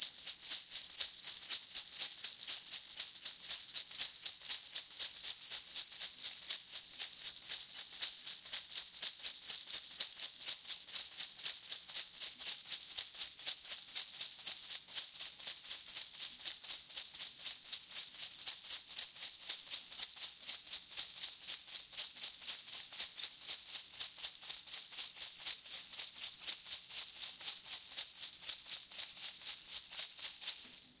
Sleighbells Shaked Phase Corrected 120 bpm 15-4 LUFS
120 bpm adjusted; Stereo (large diaphragm condenser (SCM900) and dynamic (SM58) with slight phase adjustment. 122 120 bpm with tempo embedded with file from Presonus Studio One.
bells, Christmas, holidays, jingle, seasonal, winter